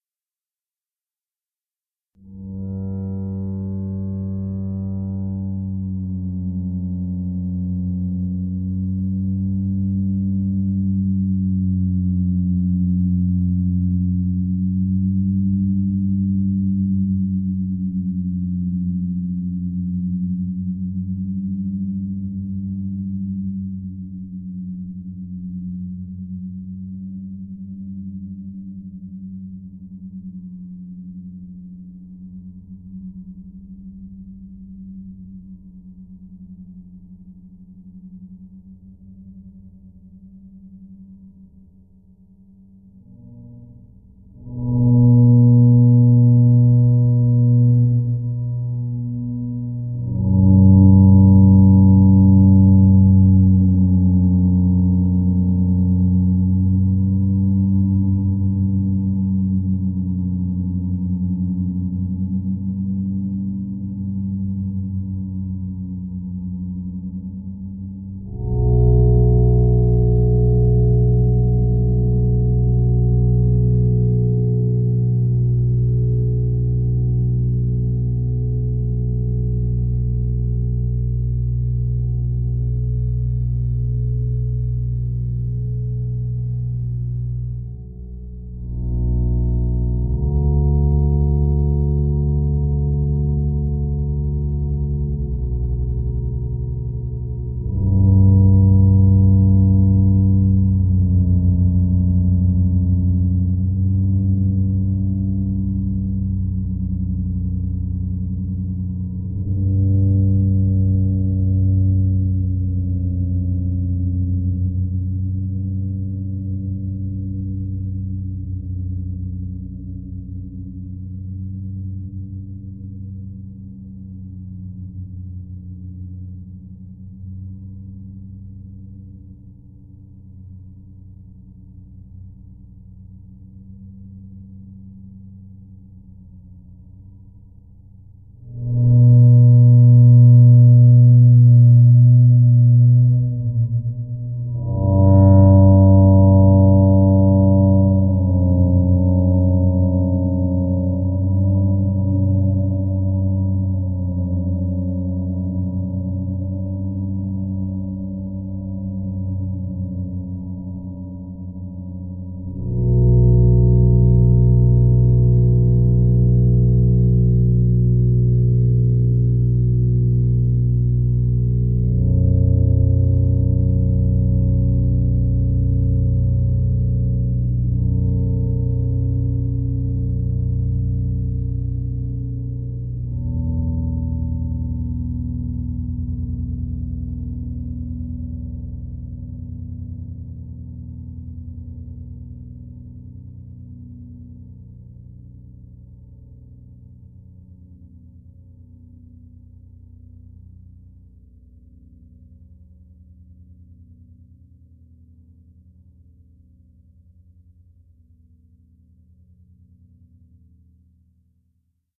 drone made from a recorded acoustic guitar. two octaves lower.
approximately 90 BPM (or 120/180 BPM)...
simple pattern but now its more dark and sinister...
manipulation, guitar